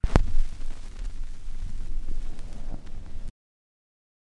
putting a vinyl on
The sound of the needle beeing put on a vinyl, and its first seconds before a song begins
Enjoy DIY.
If you use my sounds I'll be glad to hear what you create.
retro, surface-noise, turntable, vinyl